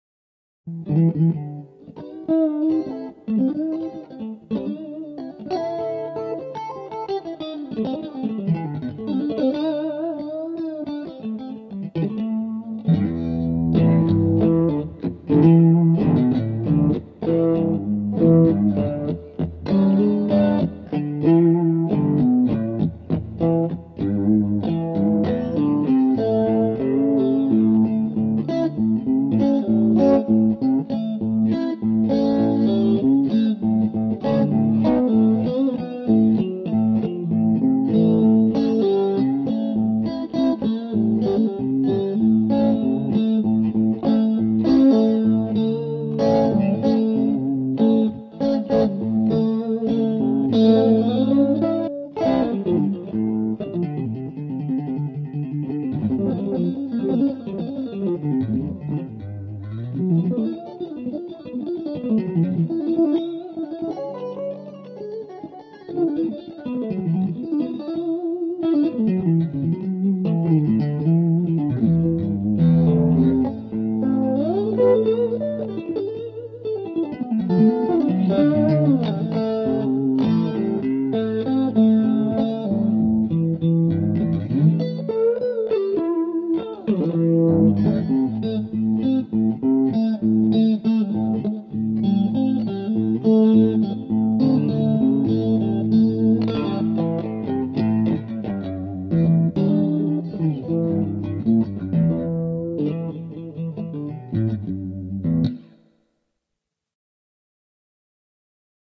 Guitar Jam 1 - Jump Alone
This is a quick piece I threw together. I did the solo and the rhythm in 2 separate recordings and then spliced them together. You can actually hear the time changes because I wasn't using a metronome, oops! Oh well it still sounds alright and as far as I know no one is giving me money for this so if you don't like it, tough. I used a Vox Tonelab LE on preset channel #25 ORANGE. My guitar is a Deluxe American Fender Stratocaster.
srv, guitar, jam, guitar-jam